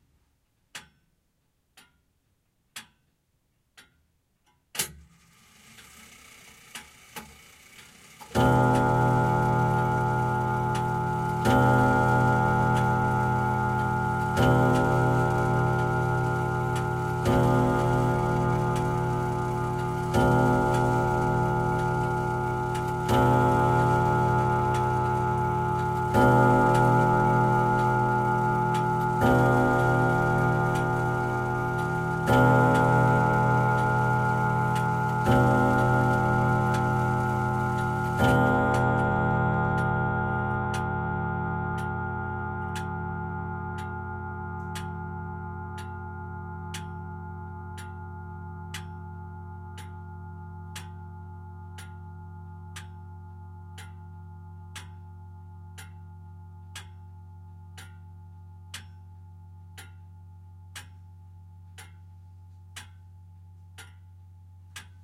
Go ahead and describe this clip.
I didn´t made it until midnight. 11pm, Olympus LS-10 recorder in a grandfather clock. Sorry, that it isn´t a longer recording, but it wasn´t recorded at my place.
11pm, chimes, clock, field-recording, grandfatherclock, ringtone, time